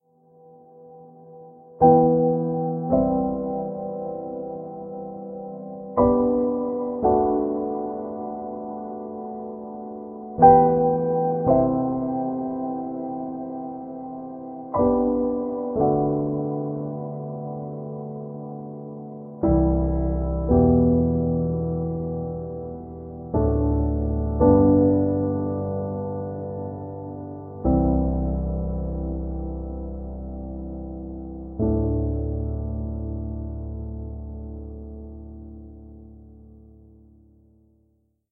Flash piano ambient
I self composed this thing of The Flash on piano
ambient, background, cinematic, drama, dramatic, film, movie, piano, sad, soundtrack, sountracks, suspense, tragedy